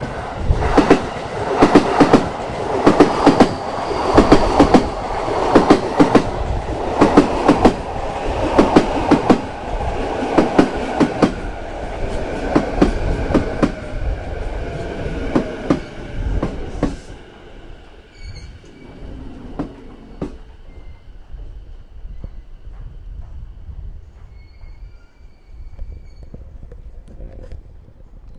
train yokosuka
car, field, recording, station, stop, train